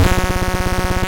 APC-Inteject
APC, Atari-Punk-Console, diy, drone, glitch, Lo-Fi, noise